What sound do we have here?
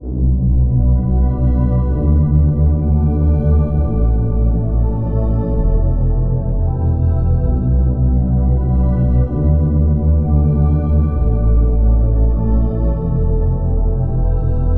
Bass & Pad
this bass loop with pad of my track
Bass, Future, Garage, Loop, Pad, Synthesizer